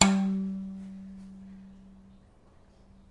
Plucking the duller G string on a violin again in an attempt to get a better sample.